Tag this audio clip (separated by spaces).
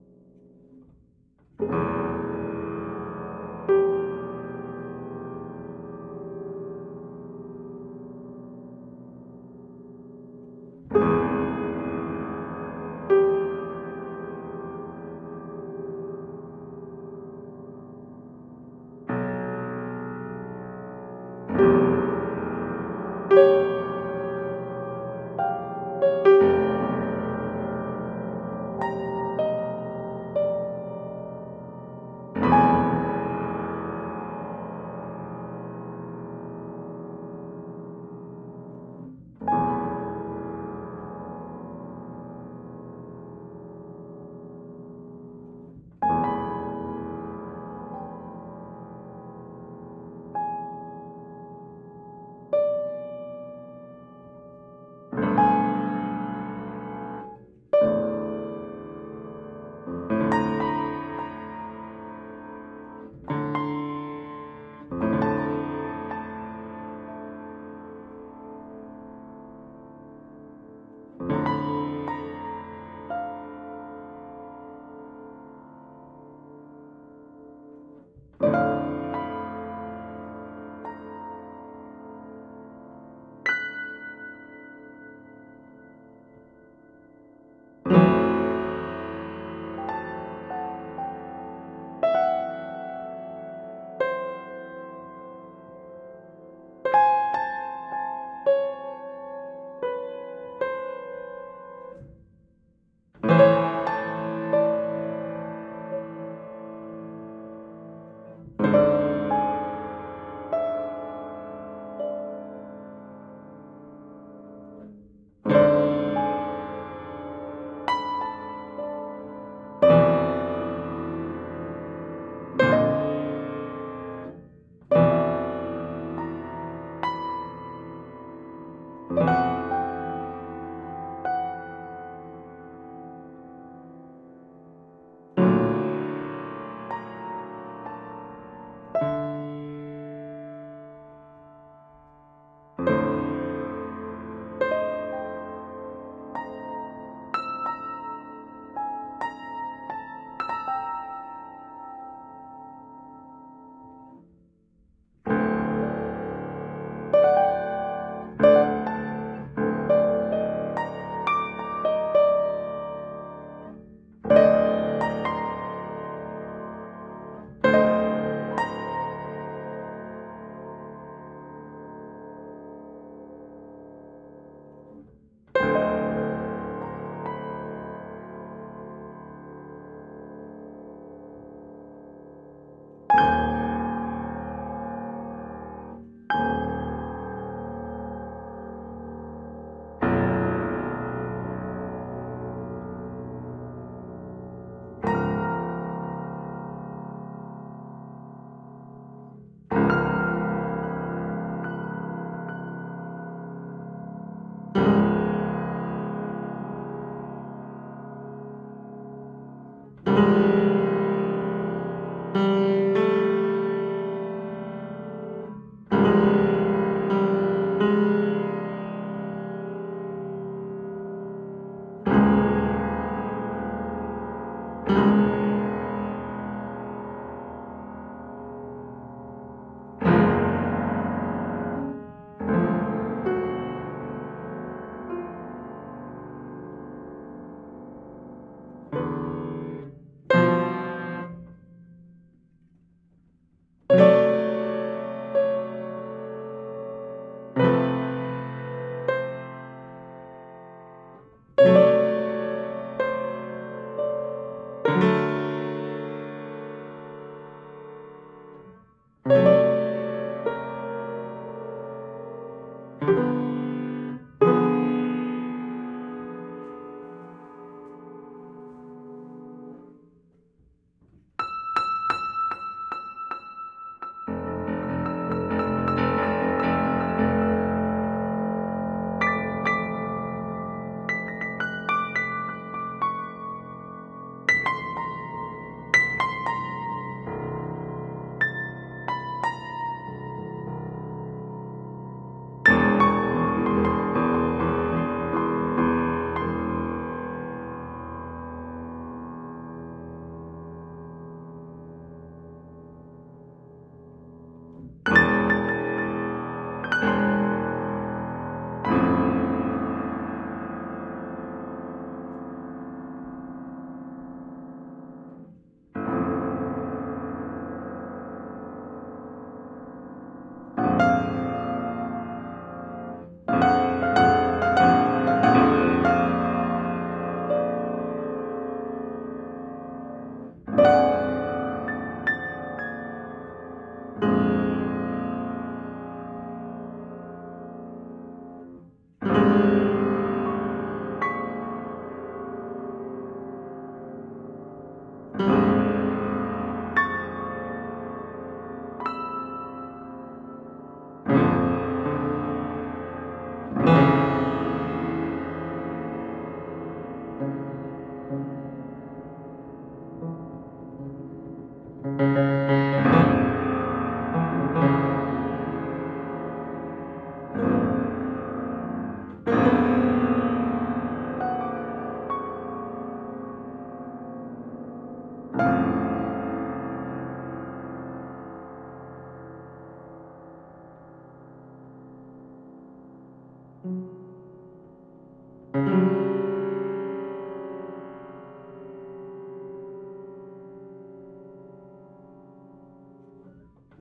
small-room close-mic xy-stereo upright-piano experimental improvisation Rode-NT4 dark